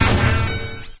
STAB 093 mastered 16 bit
Electronic percussion created with Metaphysical Function from Native Instruments within Cubase SX. Mastering done within Wavelab using Elemental Audio and TC plugins. A weird spacy short electronic effect for synthetic soundsculpturing.
electronic, stab, percussion